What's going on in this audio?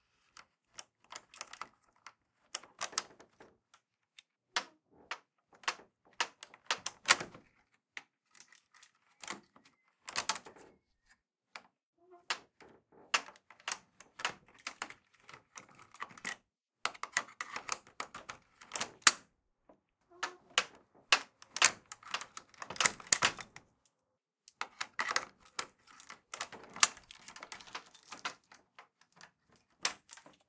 Old Shed Keys
A set of old padlock keys being rattled and handled
keys, metal, old, rattle